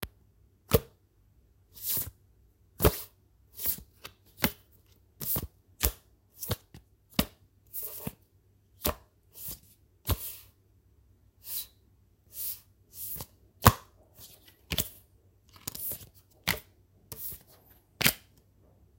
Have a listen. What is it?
Sliding Placing Putting Down Playing Card Cards
Sliding and placing playing cards (paper-based) on a smooth wooden surface.
casino, gambling, game, shuffling